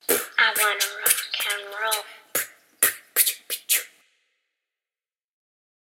rock and roll made by my voice!
this rock and roll song is made by my voice! hope you guys like it!
roll, yay, yea, me, rock, sounds, voice, by